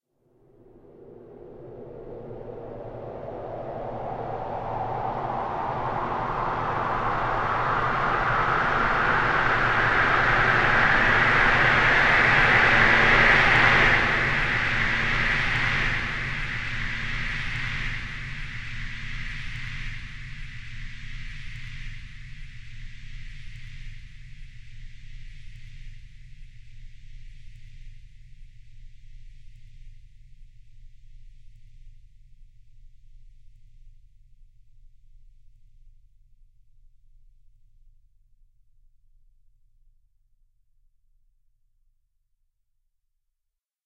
long-sweep
A bunch of various filtersweeps I created in Adobe Audition by generating whitenoise and using the filtersweep plugin. Useful for creating build-ups.
buildup, noise, white, sweep, filter, filtersweep, whitenoise